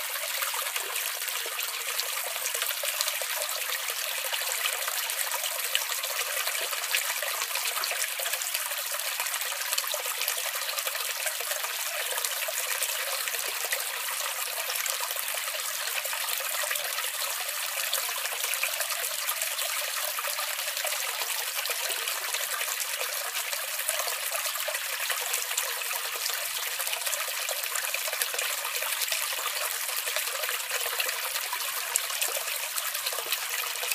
Stream2 (Seamless loop)
Close recording of small stream water trickle sound suitable for relaxation, background noise or for making people want to pee. Seamless loop.
Recorded with the ever-trustworthy 5th-gen iPod touch, which did quite a good job here I must admit.